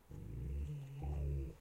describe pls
Deep growl from the family dog as we play tug of war with her favorite toy. She has a very sinister, guttural growl that is betrayed by her playful intentions. In the background, you can hear the metal leash rattling on her neck.
animal, dog, growl, guttural, play